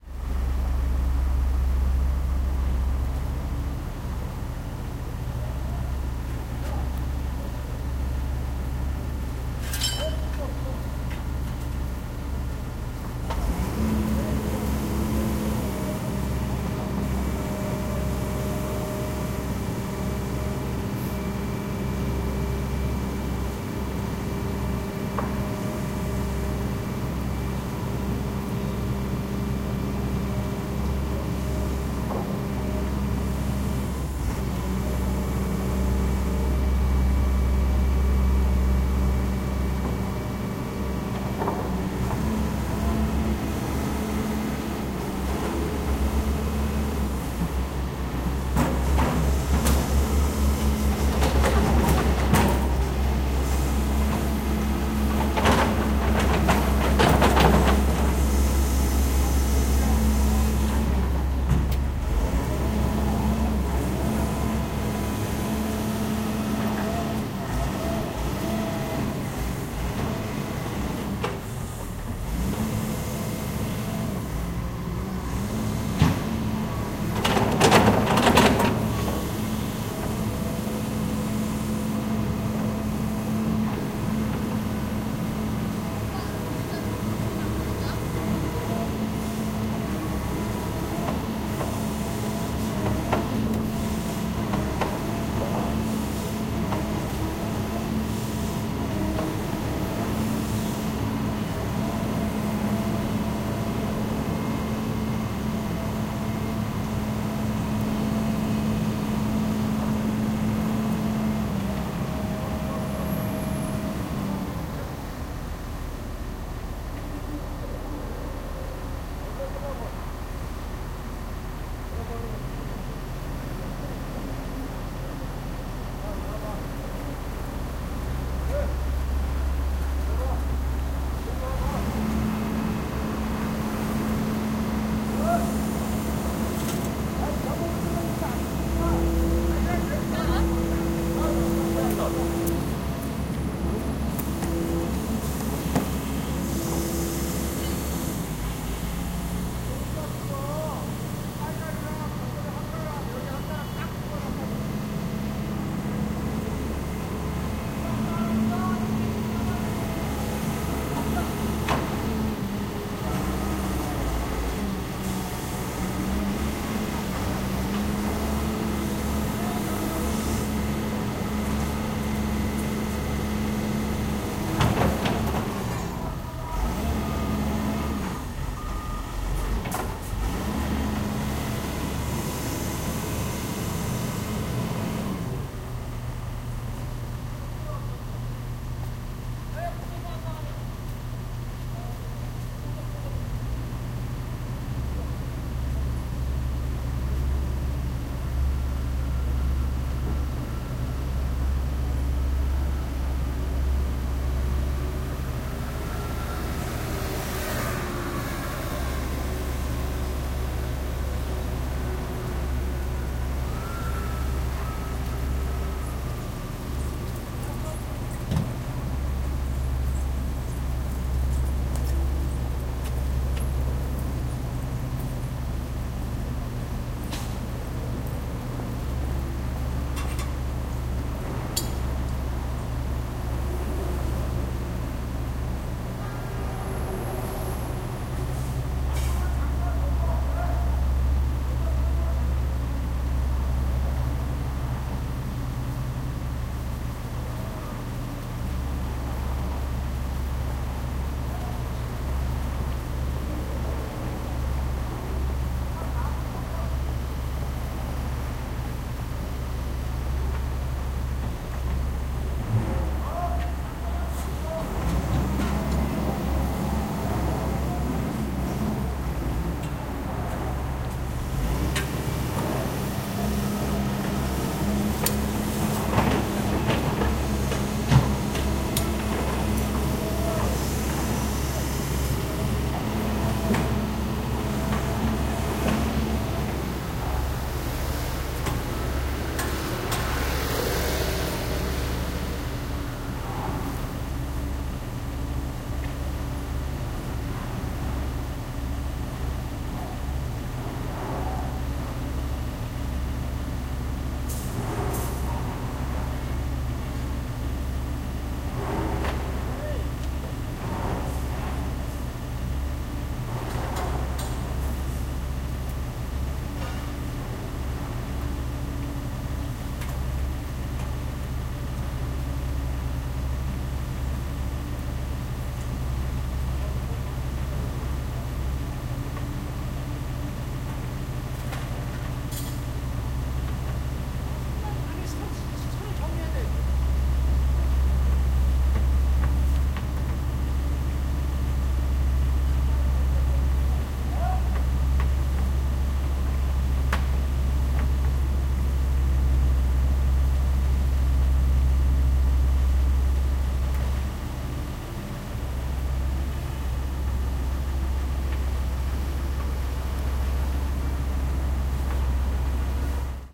Excavator at work, construction.
20120212
construction, engine, seoul